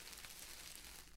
Sound of steps in a table of wood.
falling, grit, sand